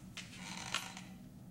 A creaky sound effect for either chairs or floor boards.